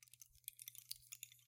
rain stick with reverb and delay
a short loop of a couple of rainstick seeds with delay and reverb applied. Recorded and processed with Adobe Soundbooth and Sterling mic ST-66 large tube condenser.